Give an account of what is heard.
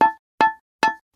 Glass Strikes 01
Multiple strikes to glass plate/window; not breaking.
break-glass, glass, Glass-break, glass-shatter, shatter-glass, shatterglass-smash, smash-glass